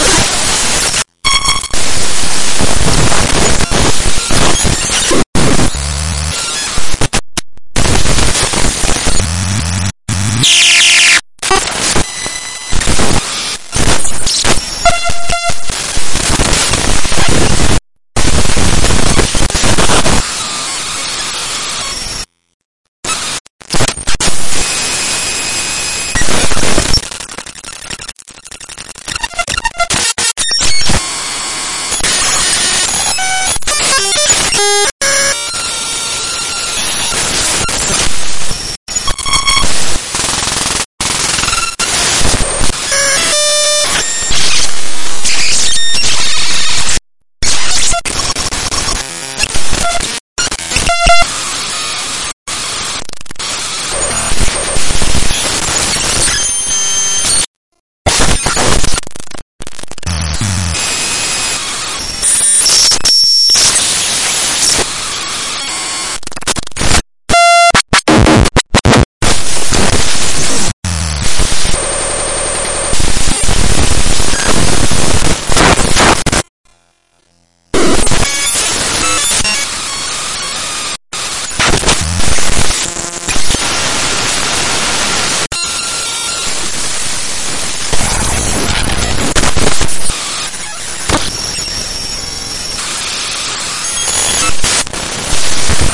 Just scrambled together some random files imported raw into Audacity.
As of March 21, 2025, this sound is now used in my game, Comstruct.